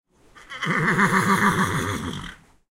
Horse Whinny, Close, A
Audio of a horse whinnying. Removed some of the background noise with the RX Editor. The horse was approximately 15 meters from the recorder.
An example of how you might credit is by putting this in the description/credits:
The sound was recorded using a "Zoom H6 (MS) recorder" on 23rd February 2018.